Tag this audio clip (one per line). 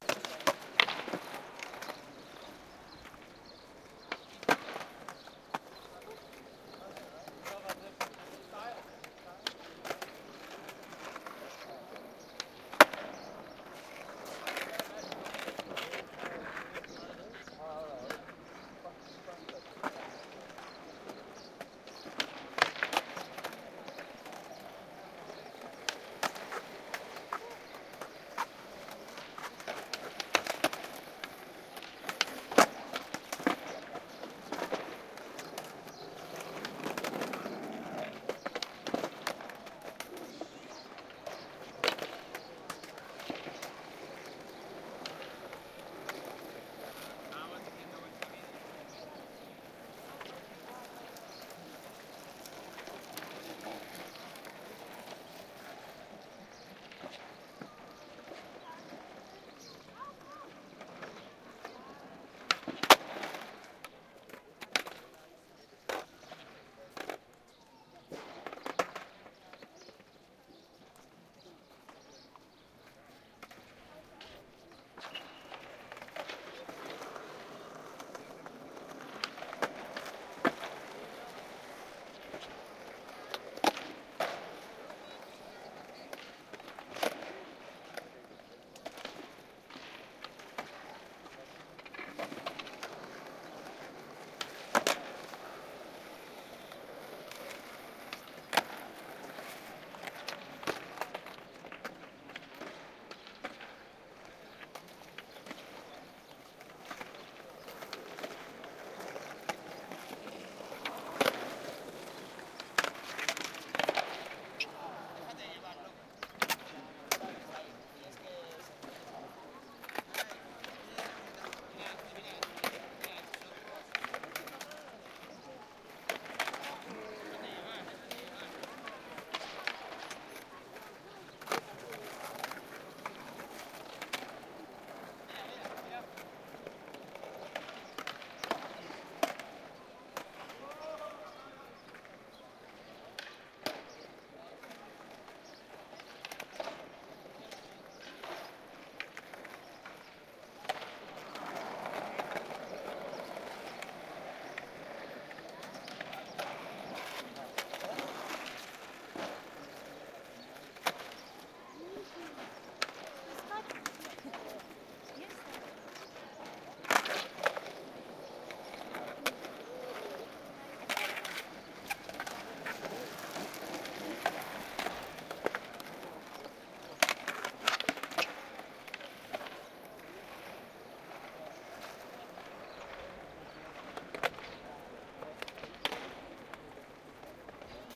skateboarders
street